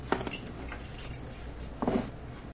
Getting a pair of keys attached to a keyring from a cupboard